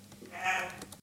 Recording of an African Grey parrot (about 50 years old) talking, recorded with Audacity and the built-in microphone on an iBook. Says "no".
parrot, talking